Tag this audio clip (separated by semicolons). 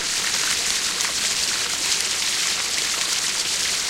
water; loop; stream